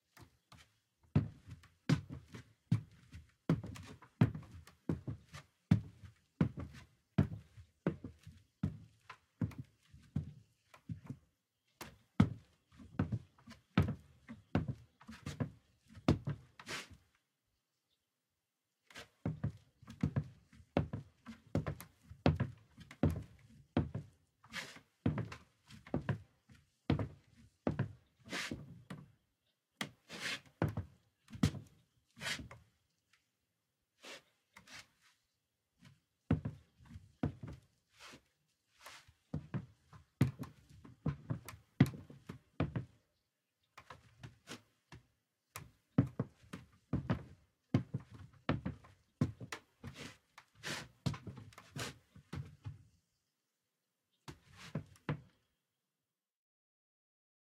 Boot Footsteps on wooden surface
Performed Foley recording for picture of a man who walks slowly in his old abandoned camping hut/house. There's walking, stopping and some foot scrapes as well. Might be useful!
Sennheiser 416 into UAD Solo 610 Tube preamp.
abandoned, boots, feet, floor, foot, footsteps, hollow, hut, man, old, scrape, slowly, surface, walk, walking, wood, wooden